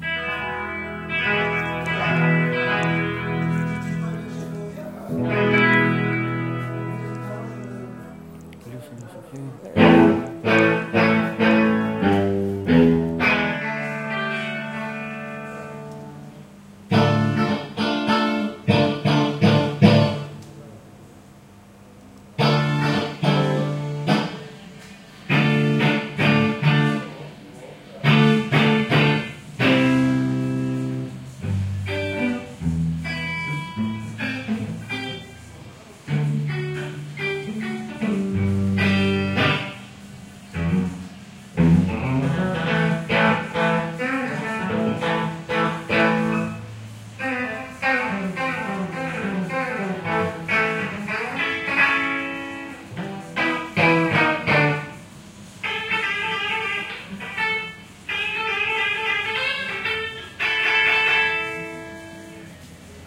Electric Guitar Test inside Music Shop
Guitarist plays some test music sounds with Electric Guitar in the Hall of Music Shop
rehearsal, live-music, live-sound, probe, guitarist, music-hall, chords, live-guitar-sound, test, music-sounds, electric-guitar, testing, music-shop, music-stock